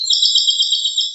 A piece of Nature. Individual bird chirps and phrases that were used in a installation called AmbiGen created by JCG Musics at 2015.